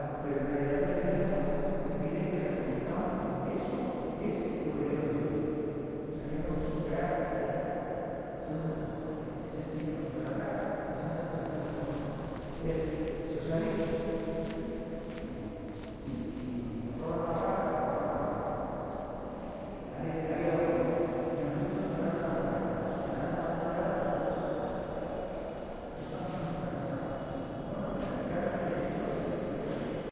20110220 church.inside
talk (in Spanish) in a large reverberating hall. Recorded at the Colegio de Santa Victoria church (Cordoba, S Spain)with PCM M10 recorder internal mics
spain, cordoba, reverb